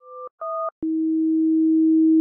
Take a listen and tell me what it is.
DS FH Fanny tones 4
Fanny asks…”que significa” “what does it mean?”, processed changing pitch, fade n synthesis
Zoom h4, hi gain.
beep, short, tone